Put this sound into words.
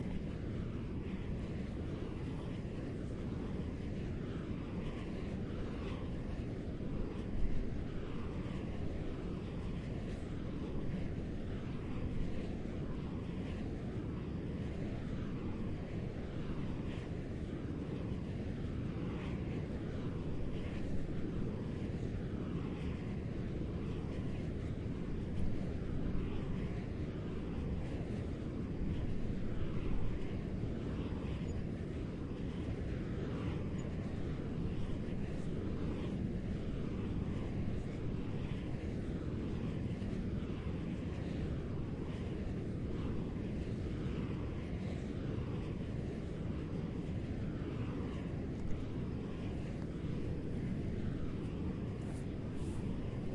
stonehouse fafe ambience
A wind plant and nature ambience mixture in Fafe, Portugal.
nature, soundscape, field-recordings, wind, wind-plant, crickets, countryside